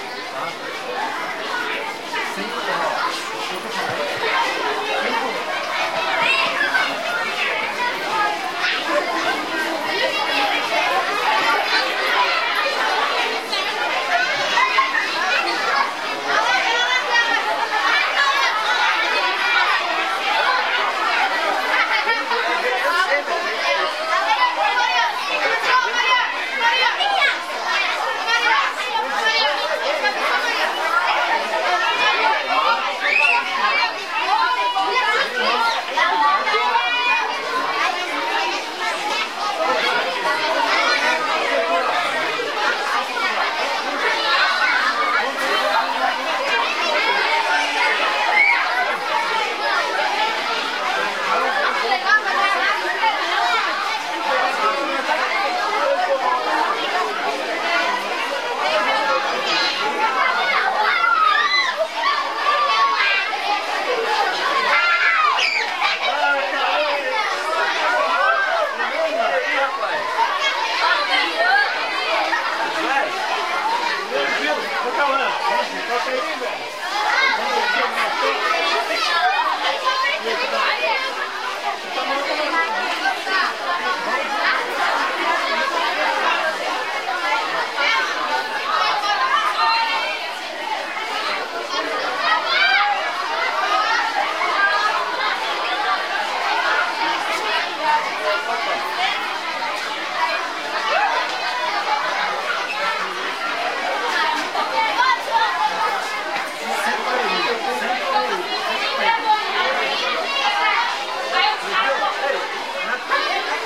Children entering school
Criançças chegando à escola Sandoval, Ibirité, Brasil.